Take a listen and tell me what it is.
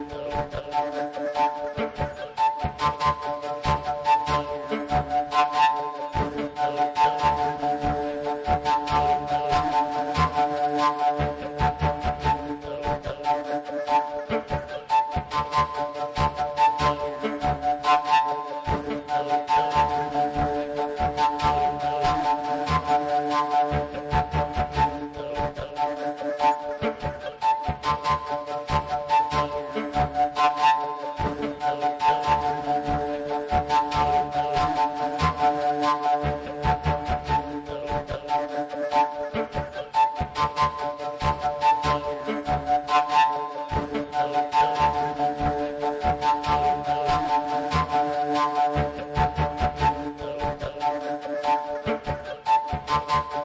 This is a loop I created using my homemade Fujara made of platic waste pipe, some turned wood and pvc tubing. Then played through a Behringer BSY600 Bass synth pedal, Electrix Mo-Fx for a bit of delay and then looped in my Vox VDL-1 Looper.
bsy600, fujara, behringer